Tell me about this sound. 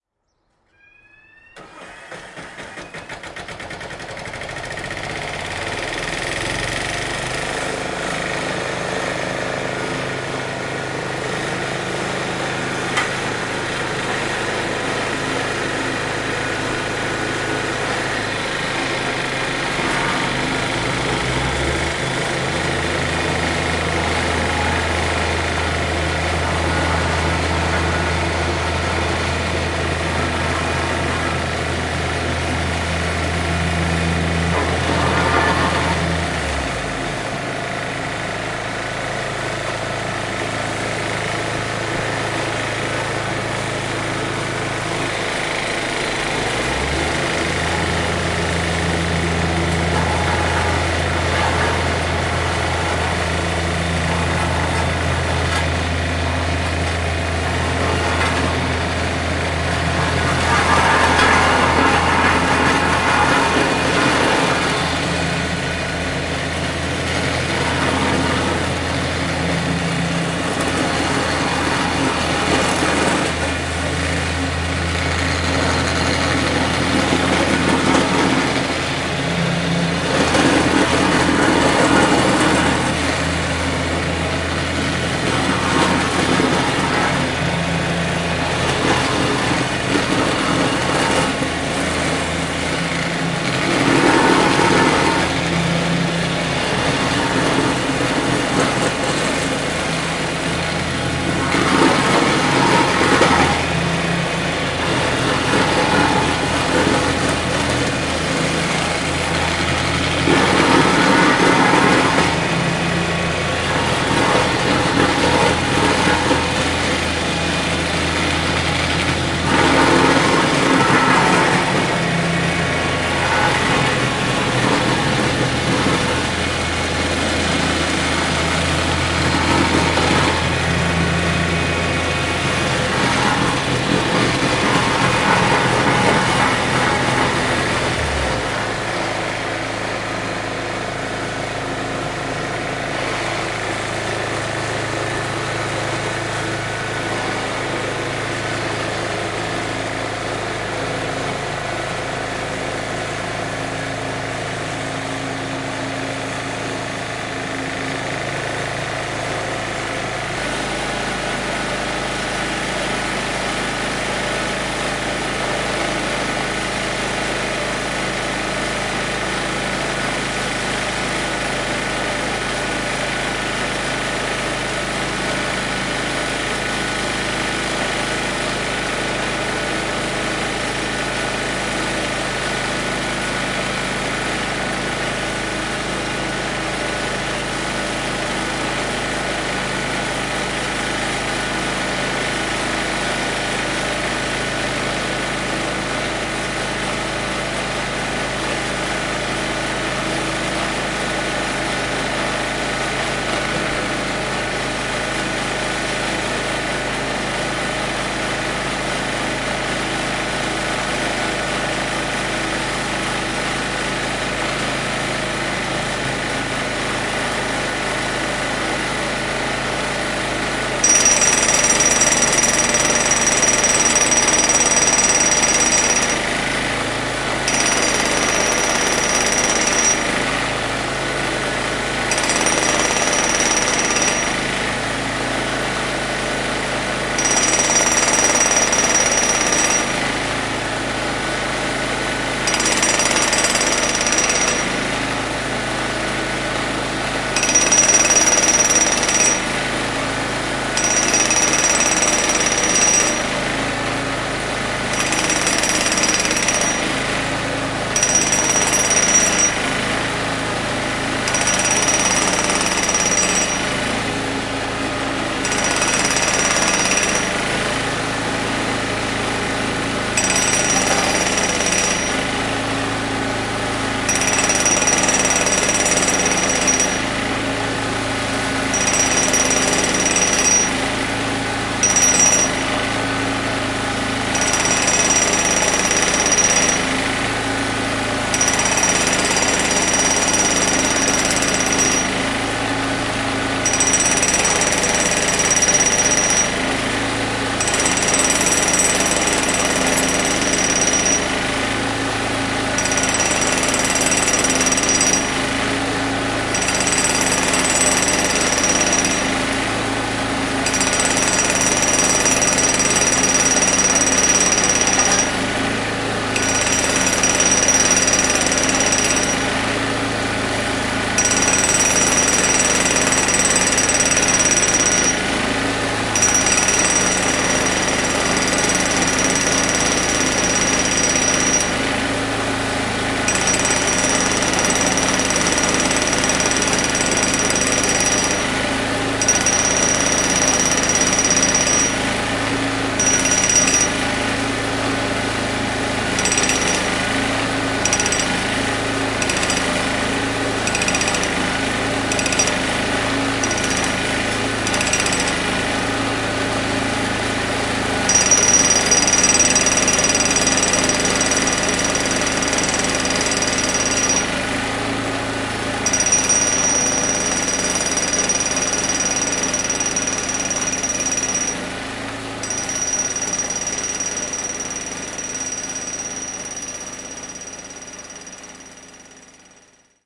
Road Works
Recorded using the built-in mics on a Zoom H4n - I didn't even need to go anywhere, they were fixing the road right outside my window :D